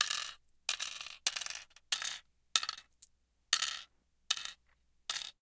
Dropping single mancala pieces into the cups of the board.
clatter, game, glass, mancala, wood